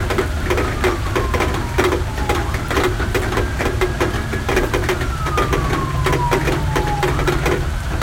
Rain with faint Sirens
Some rain,drops on metal, and sirens